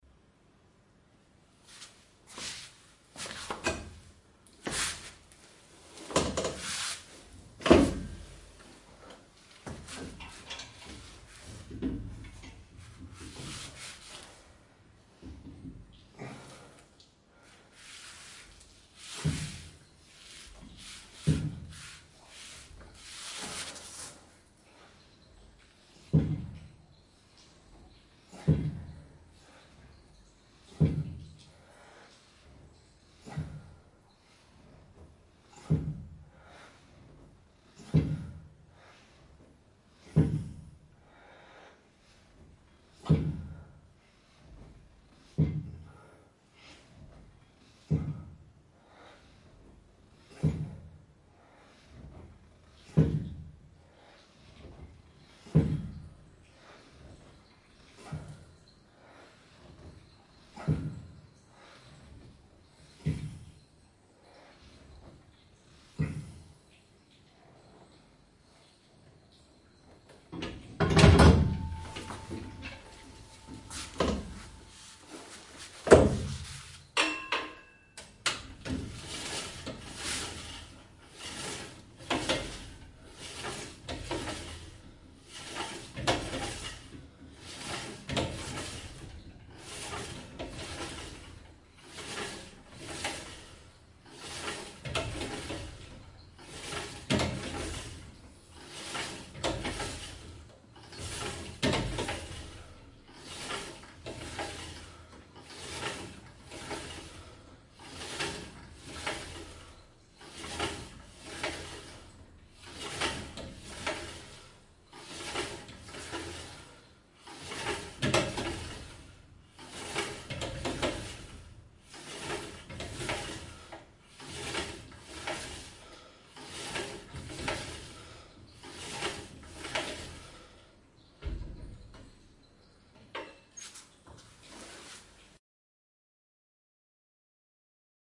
Sets of bench and lat pulldown in the small room gym.
Gym, Bench, Pulldown, Lat